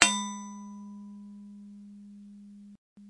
Recording direct to PC back in 1999. Hitting a 6" spackle knife with a wrench or a screwdriver (I forget).
ding, percussion, bell, spackle-knife